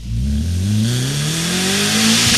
5-second acceleration sweep featuring a Mercedes-Benz 190E-16V. Mic'd with an Audix D6 1 foot behind the exhaust outlet, parallel to the ground.